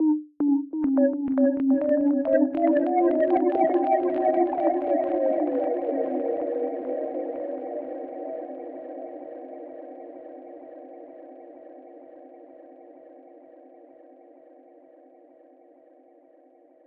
Delay effect with some differnt delay times. Useful for dubstep or anything else. Created in Music Studio.